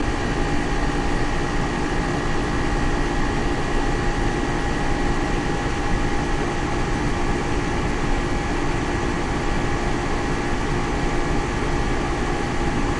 AC fan w compressor loop
My window air-conditioner, with both fan and compressor running, edited into a loop. Please use in conjunction with other samples in this pack. Recorded on Yeti USB microphone on the stereo setting. Microphone was placed about 6 inches from the unit, right below the top vents where the air comes out. Some very low frequency rumble was attenuated slightly.